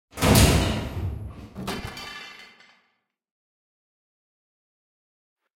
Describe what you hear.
Metal - Air/Ventilation shaft kicked open 2
I've created this sound for my project by layering a lot of sounds together, since I couldn't find it here. This one has more metal impact at the end.